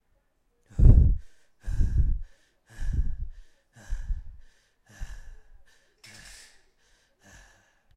vocals panting
heavy-breathing, male, OWI, panting